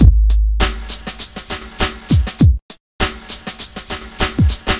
Jungle beat made by me for some theme I never finished, I think...
Made with Hammerhead Rythm Station.